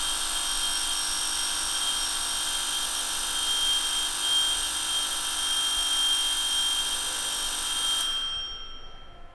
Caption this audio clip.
8 School bell
school bell ringing
bell, ringing, school